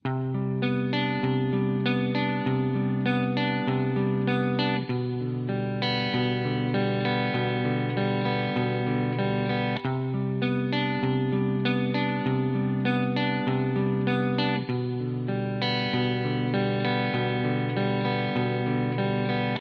almost clean guitar...